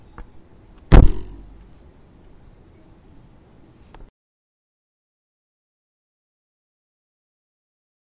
snapping ruler vibration
recording of a ruler being snapped against a table. a short vibrating sound